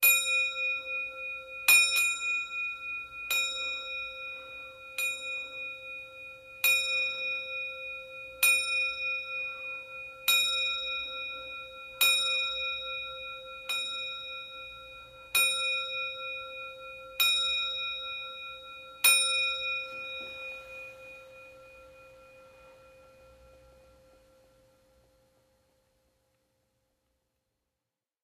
old clock bell
Antique clock bell. Recorded with edirol R-09 and external OKM stereo mic.